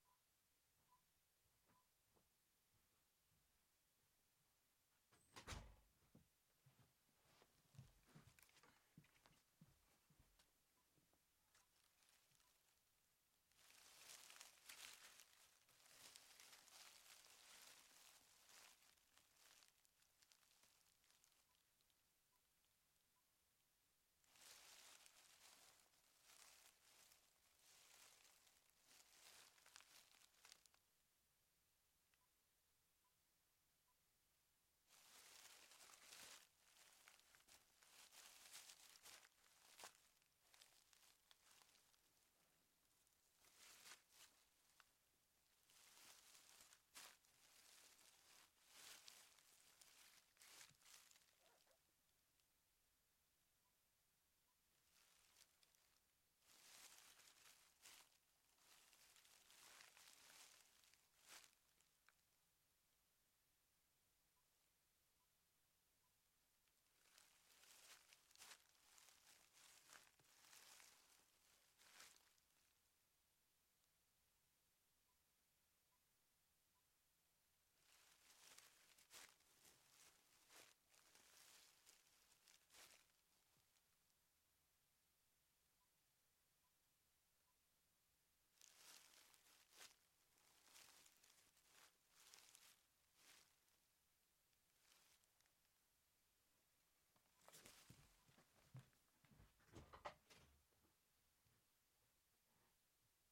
Leaf shift 3
Leafs, Paper, Rapping